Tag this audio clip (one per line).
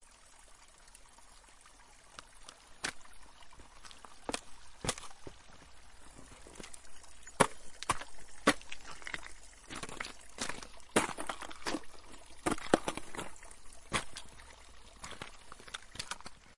Breaking
frozen
winter
Ice